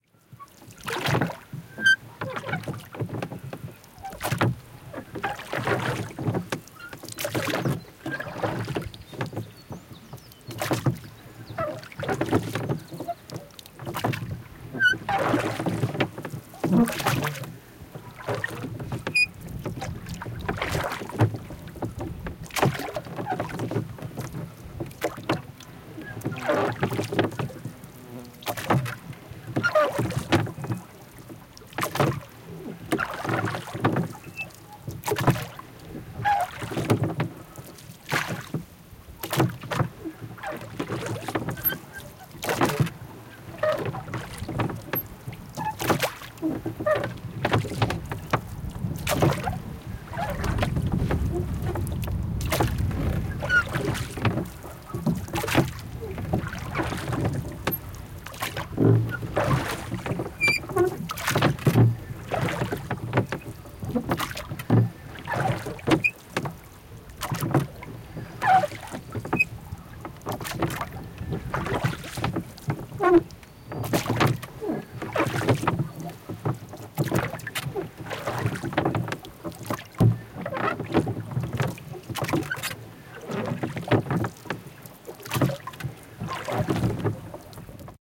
rowing boat on sea - actions
Some Fieldrecordings i did during my holidays in sweden
Its already edited. You only have to cut the samples on your own.
For professional Sounddesign/Foley just hit me up.
field-recording, sea